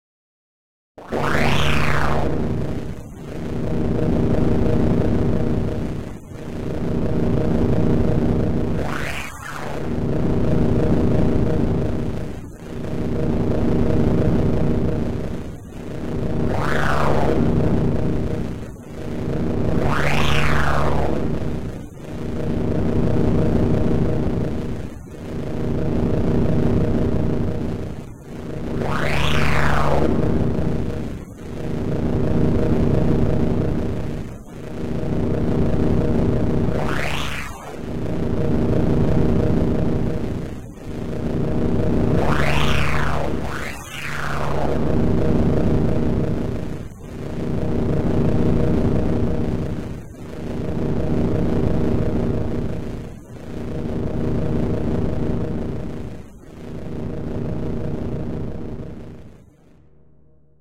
Machinery AH
Part of a Synthetic Machinery Audio Pack that i've created.
amSynth and a load of various Ladspa, LV2 filters used. Enjoy!
Machine, Machinery, Mechanical, Sci-fi, Synthetic